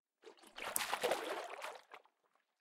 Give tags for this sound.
liquid
splash
water

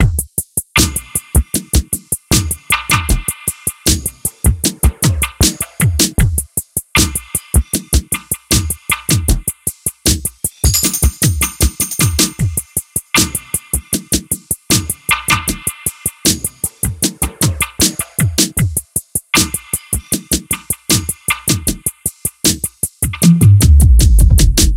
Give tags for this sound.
loop
rhythm

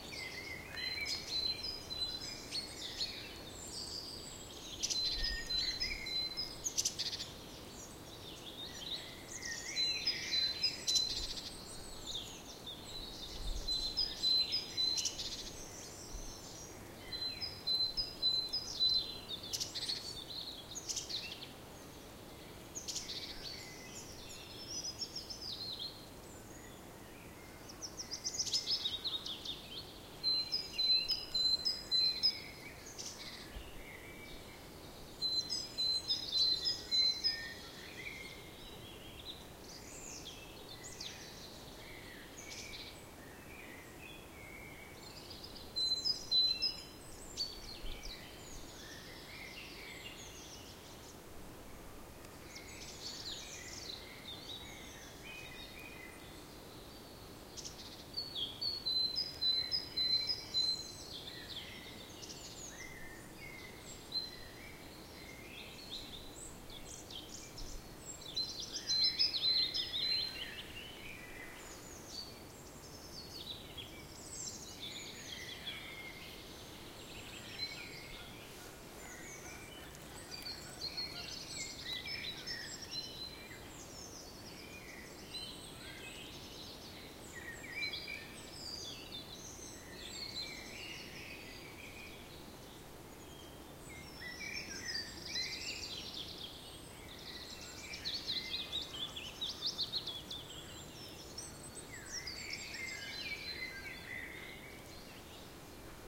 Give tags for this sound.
singing
bird
spring
song
Sweden
April
birds

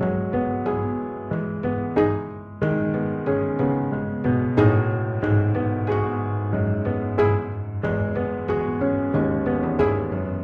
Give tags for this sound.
loop
acoustic
piano

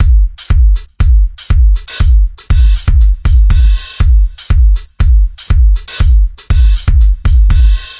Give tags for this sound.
beat
drum

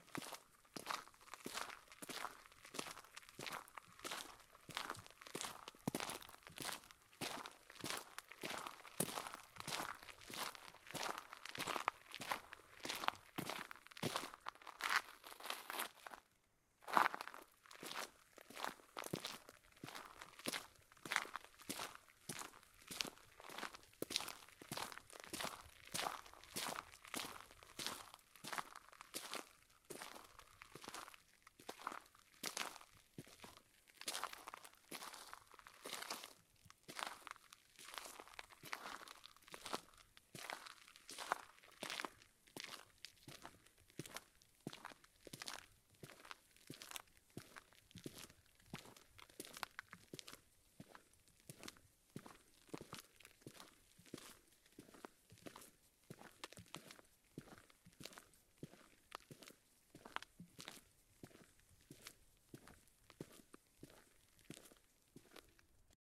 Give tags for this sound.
aussen
crispy
crunchy
dirt
draussen
dreckiger
exterior
field-recording
foot
footstep
footsteps
gehen
langsam
laufen
path
schritte
slow
steiniger
step
steps
stone
walk
walking
way
weg

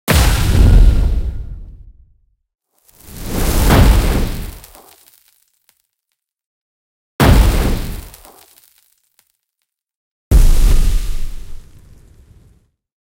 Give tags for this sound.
impact
burn
ignite
fire
burning
magic
spell
fireball
flame

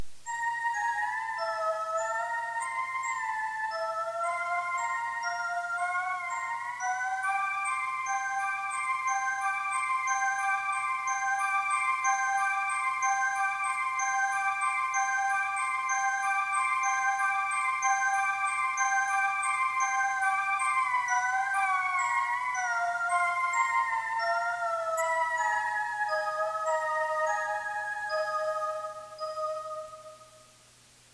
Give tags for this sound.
absurd
musical
musical-box
music-box

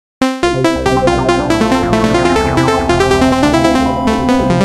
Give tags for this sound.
1
beat
loop
music

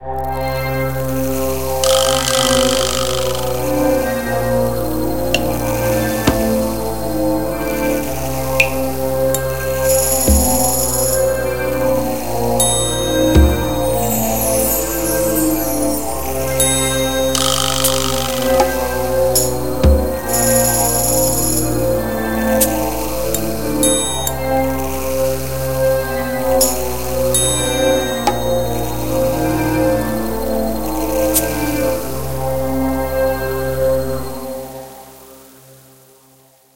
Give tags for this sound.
background
film
movie
thriller-music
weird

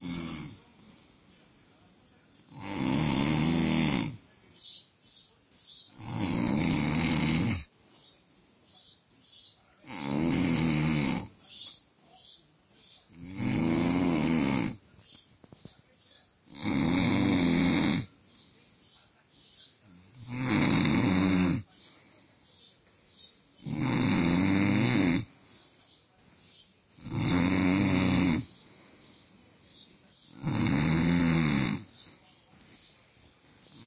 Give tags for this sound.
man
Snooring
ronquidos
male

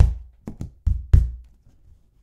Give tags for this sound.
0
boxes
egoless
natural
sounds
stomping
vol

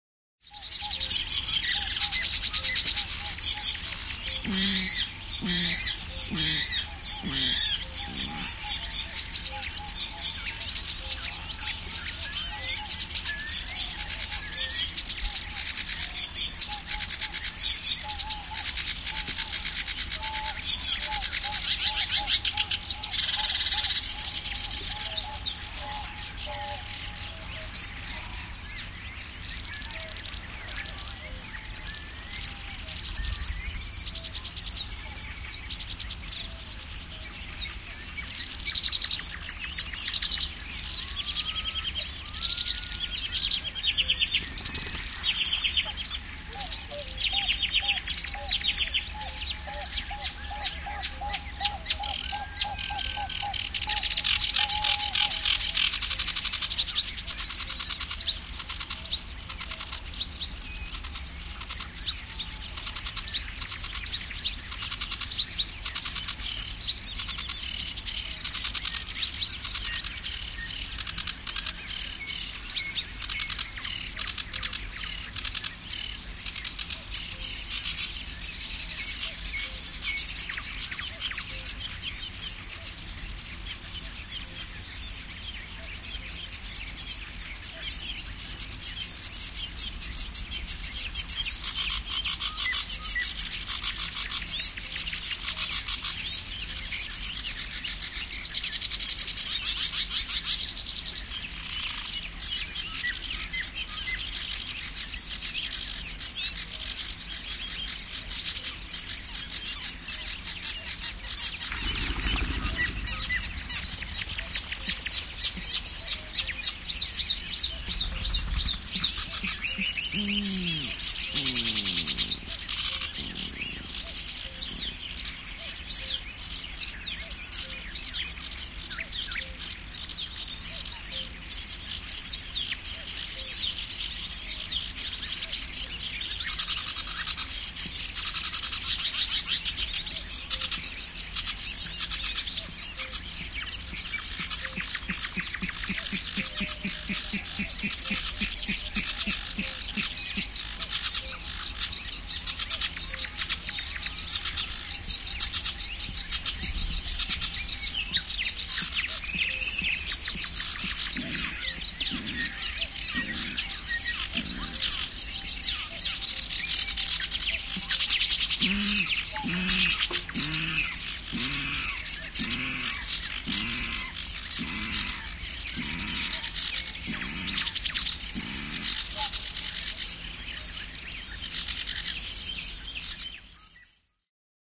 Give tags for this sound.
Birds
Birdsong
Canebrake
Early-summer
Field-Recording
Finland
Finnish-Broadcasting-Company
Linnunlaulu
Linnut
Luonto
Nature
Ruovikko
Soundfx
Spring
Tehosteet
Yle
Yleisradio

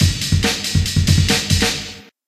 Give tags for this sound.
dnb
bpm
140
drum
break

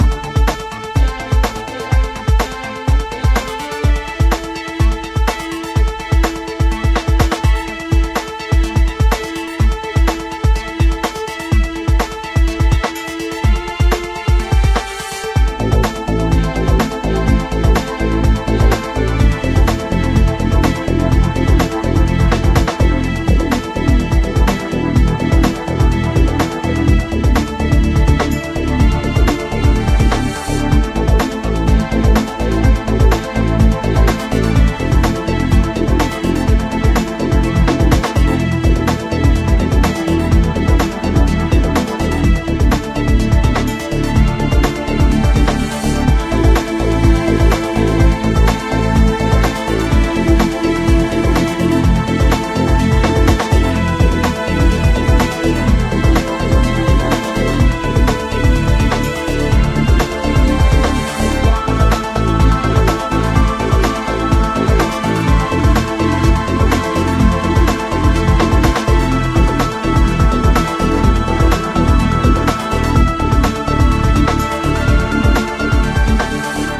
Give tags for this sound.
Puzzle
music-loop
videogames
Philosophical
indiegamedev
videogame
gamedeveloping
gamedev
loop
game
music
video-game
games
sfx
indiedev
gaming
Thoughtful

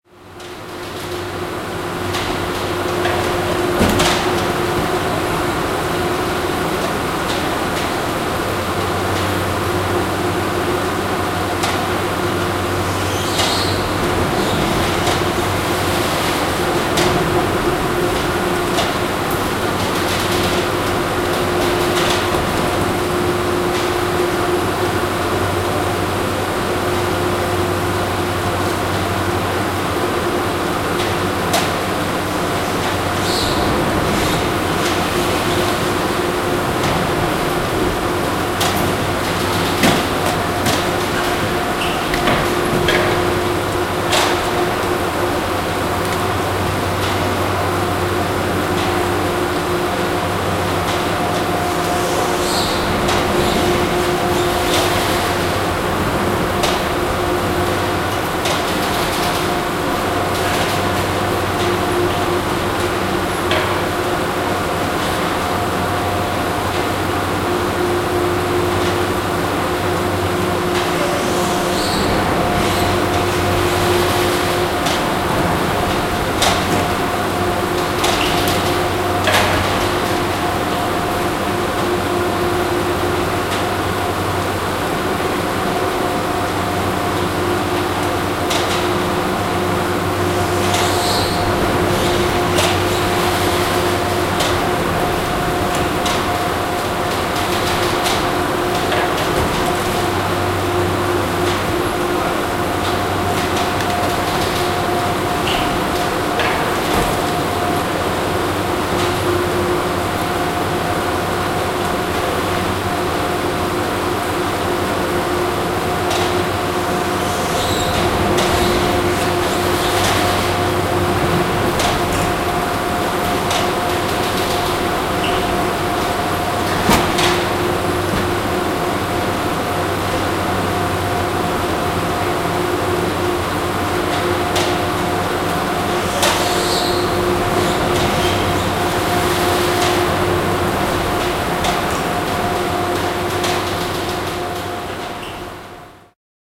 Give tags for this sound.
Alps
cable-car
chairlift
factory
field-recording
French-Alps
industrial
mechanical-noises
mountain
ski
Ski-resort
snow
transportation
winter
winter-sports
zoom-h2

